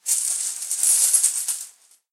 chest full o' coins
dragging my hand over some coins
booty, coins, gold